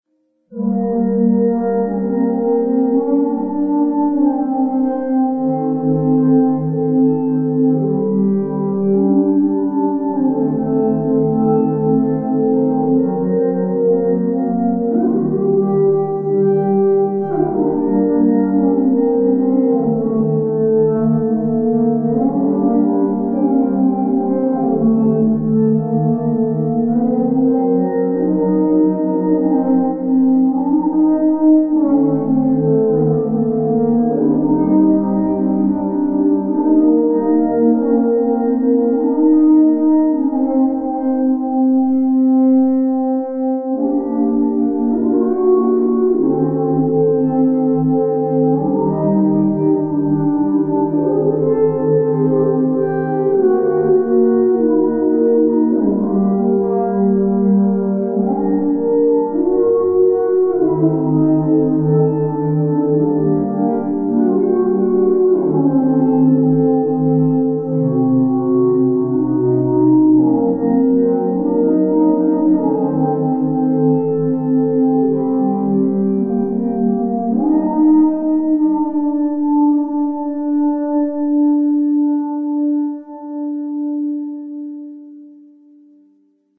Similitude of a few singers and unidentifiable instruments performing an odd tune. This is output from an Analog Box circuit I built. This isn't likely to all that useful to anyone except perhaps as inspiration. The circuit uses a mode of a harmonic minor, typically the 2nd or the 5th. Totally synthetic sounds created in Analog Box but finalized in Cool Edit Pro.
music, synthetic, voices, abox, male, choral